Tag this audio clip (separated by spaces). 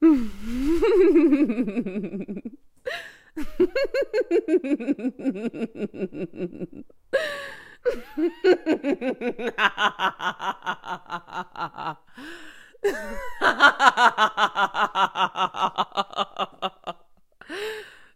macbeth evil-laugh wicked shakespeare witch cackle voice